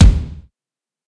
phat kick 2
loop beat drum